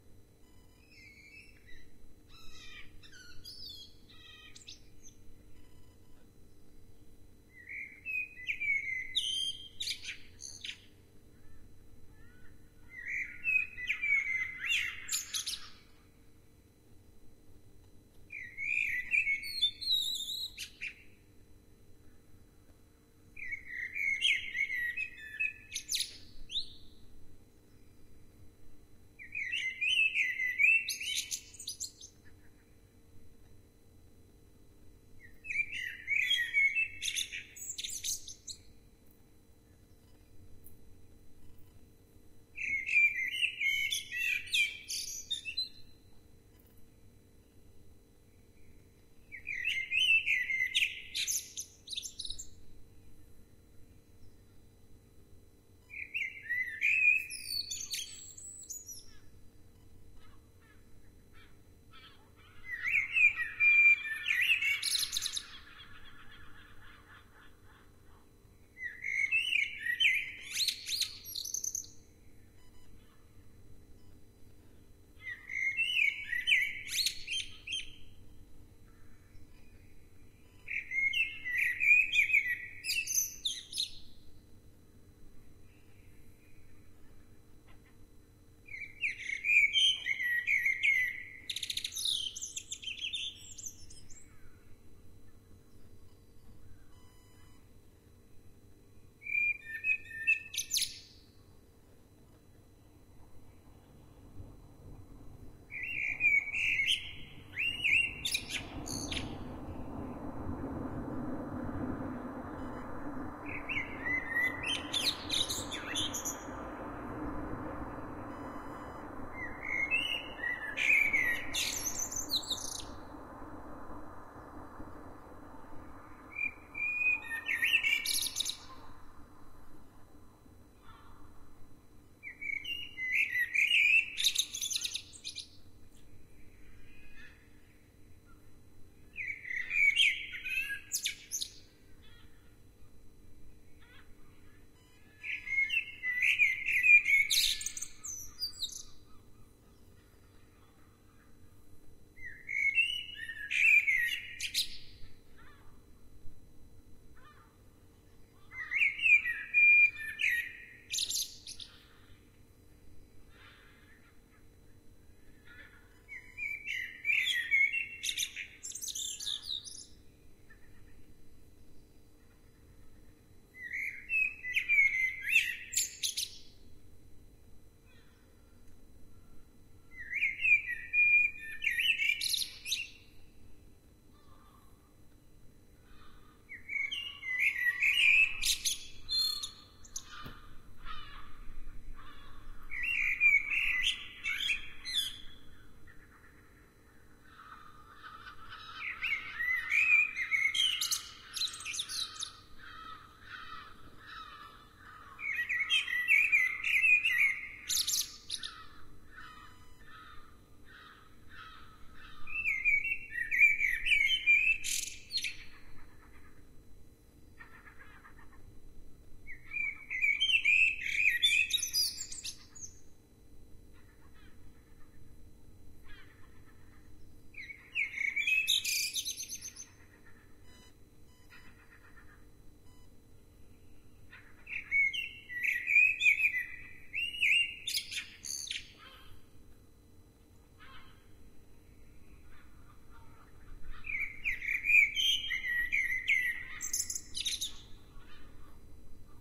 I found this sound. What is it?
Early morning birdsong in Edinburgh, Scotland
This is some very early morning birdsong that I recorded out of my bedroom window at around 4 AM in Edinburgh, Scotland. Not sure what birds these are!
Recorded with TASCAM DR-05.
early, morning, birdsong, field-recording, edinburgh